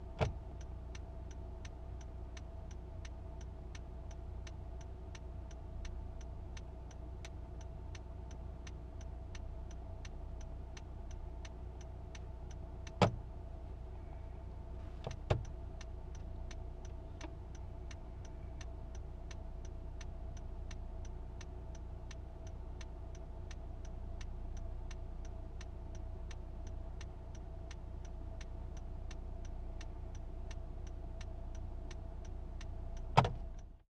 Honda Interior Blinker Idling 05032020
Honda HRV Interior, Recorded with H4n Zoom and a XM-55 Condensor Shotgun Mic
car, interior, vehicle